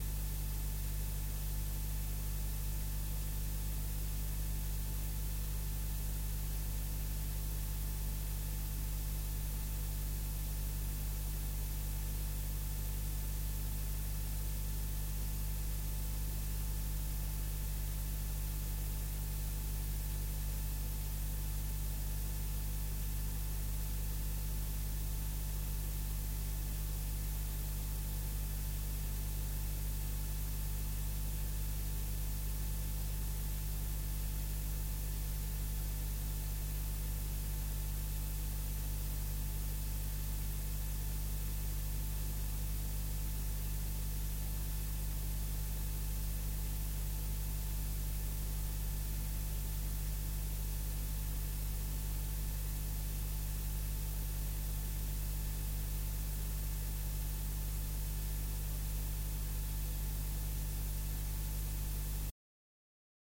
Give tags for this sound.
noise cassette-tape tape hiss

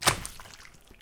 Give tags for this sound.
gorey meat mince-meat plop slappy splat splosh squish squishy wet

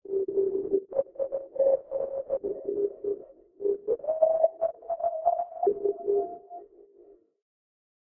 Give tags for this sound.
aliens beeps effect electric frequency glitchy noise pattern radio Random reverb ringing sci-fi signal transmission

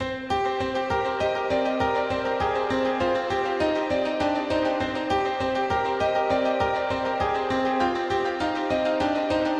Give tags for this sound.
100bpm ambient C creepy echo instrument loop music piano sample

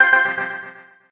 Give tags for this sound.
application bleep blip bootup click clicks desktop effect event game intro intros sfx sound startup

